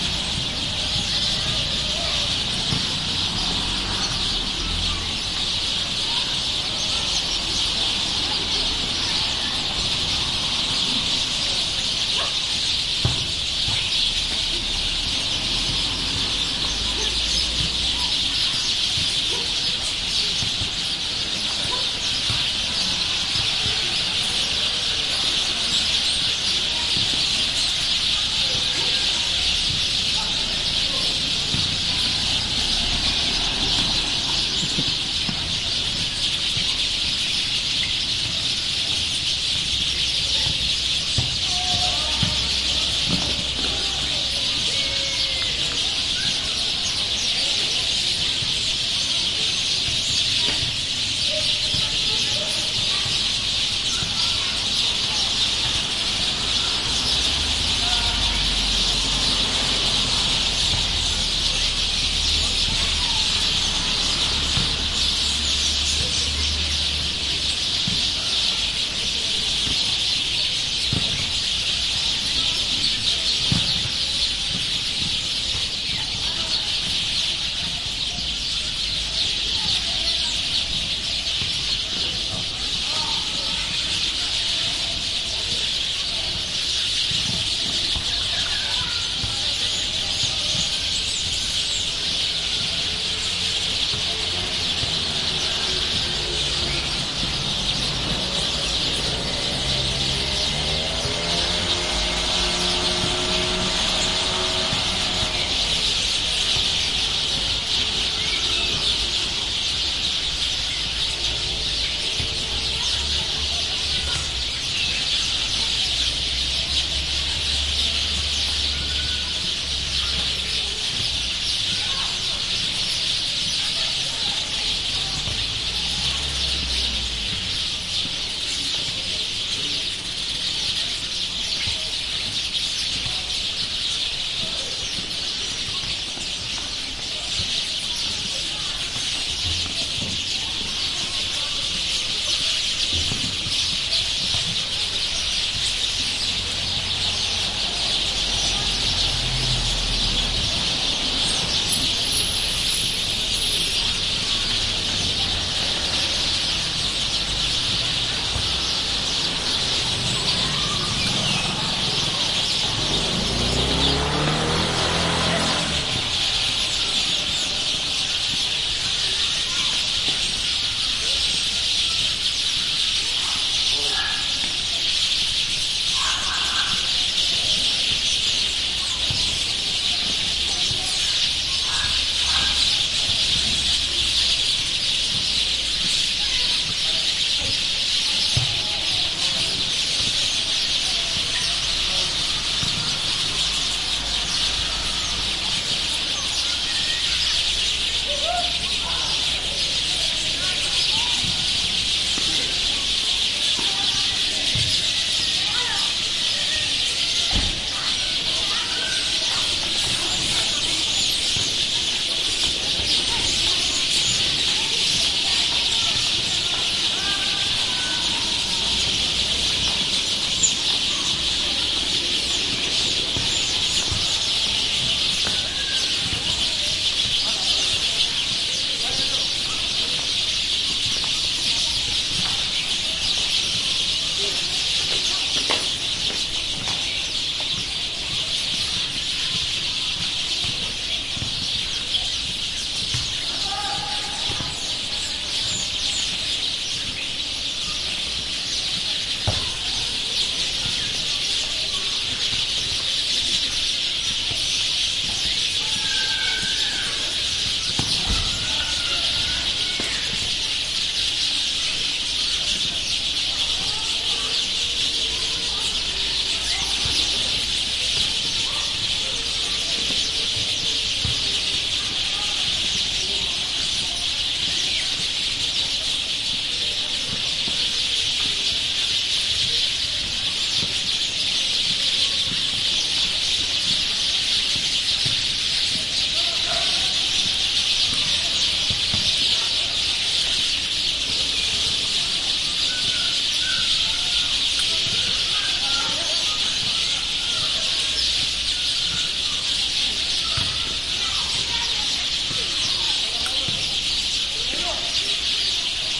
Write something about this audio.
Sound of a big flock of birds in a tree: we hear how the birds chirp in a very high level. Also, we can hear other sounds which are normal in an urban park like childrens, dogs, motorcycles...
Recorded with Zoom H4n recorder. Recorded about 18:20 on 25-11-2015
bird, chirp, flock, park, tree